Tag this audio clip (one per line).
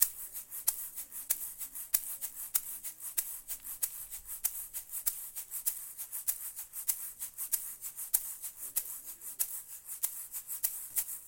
2
Egg
Groove
Open
Shaker